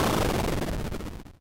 Simple retro video game sound effects created using the amazing, free ChipTone tool.
For this pack I selected the BOOM generator as a starting point.
I tried to stick to C as the root note. Well, maybe not so much in this one..
It's always nice to hear back from you.
What projects did you use these sounds for?
arcade, shot, weapon, lo-fi, cannon, gun, retro, bang, boom, classic, video-game, eightbit, explode, grenade, bomb, explosion, 8-bit, explosive, abstract, artillery, shooting, projectile, detonation, impact, missile, battle